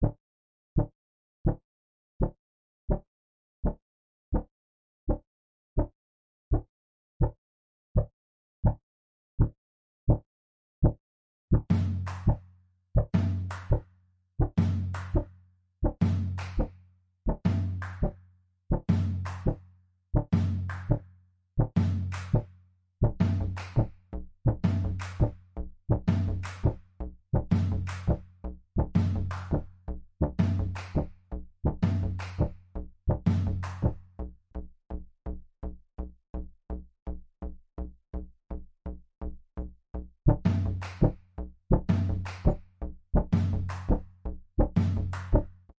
Music for film intro
Intro music for film.
Completed on Logic Pro December 2015
electronic, music, Unfinished, synth, atmosphere